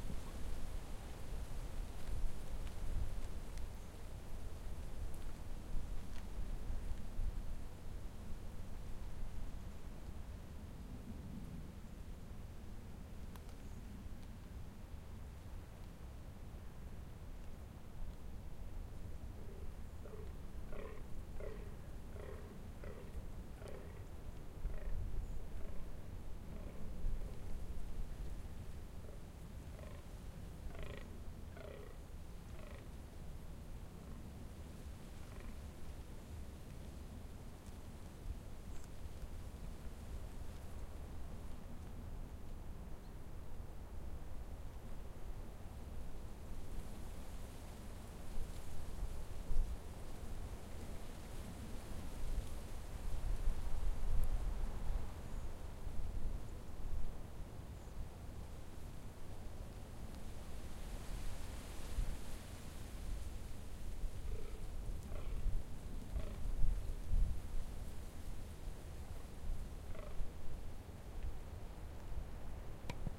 Dune Ambience with birds, wind through conifers, and roaring male deer in the mating season 1
Recording made in "de Amsterdamse Waterleiding Duinen" near Zandvoort in august 2011.
bulderen, roaring, vogels, conifers, naaldbomen, ambient, ambience